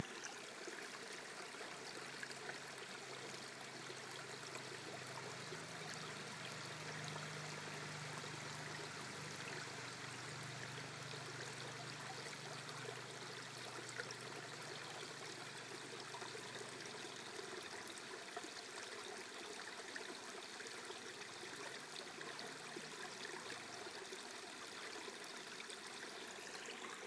Recording of the Hobart rivulet near Strickland Ave, South Hobart, Tasmania, Australia